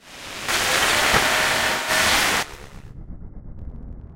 noisy drone sounds based on fieldrecordings, nice to layer with deep basses for dubstep sounds
drones, dub, experimental, fieldrecording, noise, reaktor, sounddesign